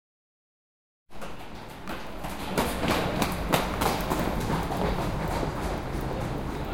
tunnel ambiance running
some people running in a subway tunnel
Edirol R-1